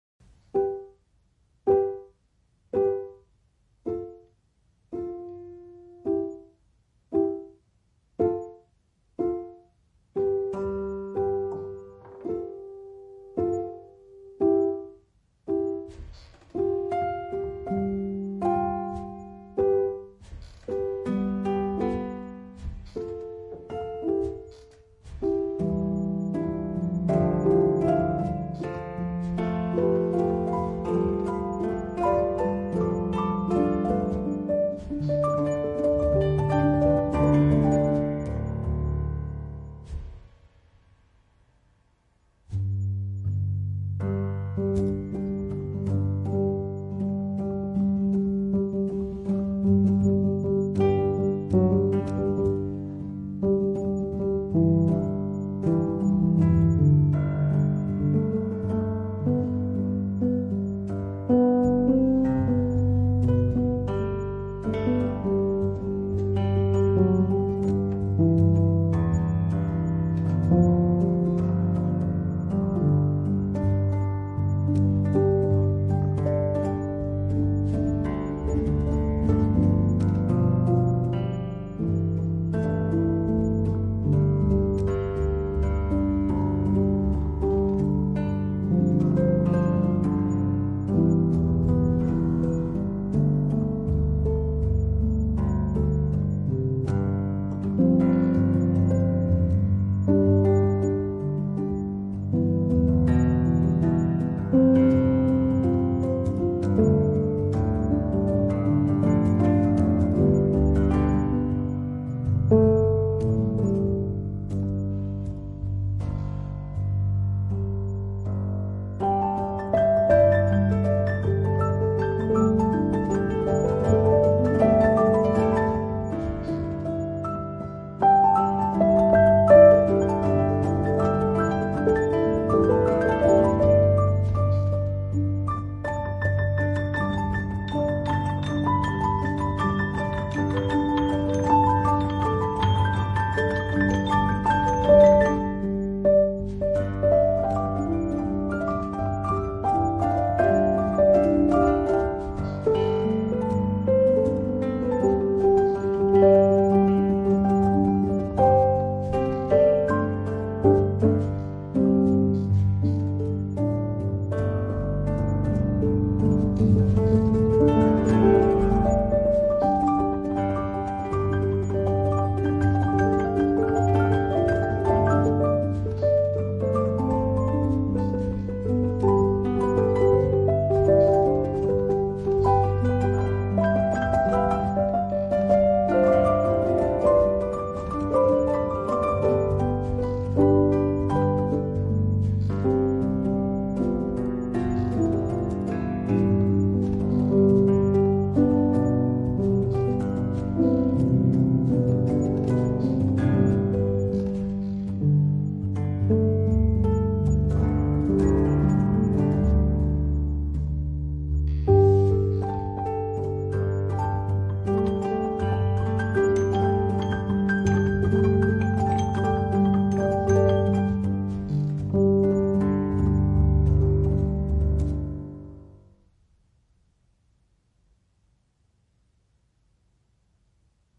Upbeat piano with melodic ambience.